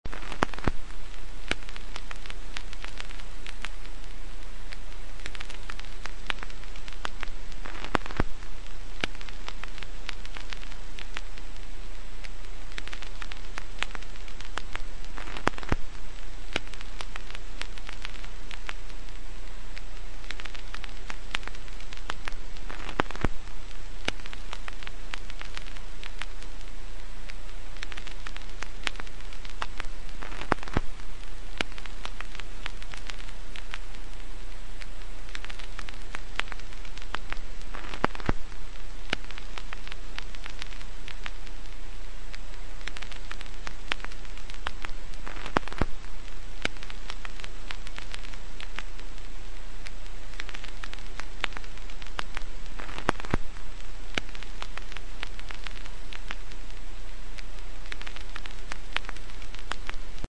Sample of a vinyl noise crackling loop up to make a gentle fire crackling sound.